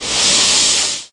Short Rocket Launch Station Standby for RTS Game
Shortly before launching Discovery.
launch, standby, rocket, noise, engine, flame, shuttle